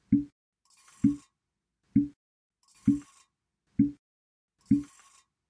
GIRARD Melissa 2020 2021 battement
I created a sound that looks like a heartbeat.
Step by step :
- recording of the sound of the air exhaust from a bottle
- I added a silence to create a regular repetition effect
- Not on purpose I recording the last sound of the bottle, it repeated the same
- sound but muffled, it was interesting so I keep it
- I added the sound of the unfolding store and I speed it up
rhythm, percussion, tempo, heartbeats, loop, drum, grinding, beatting, beat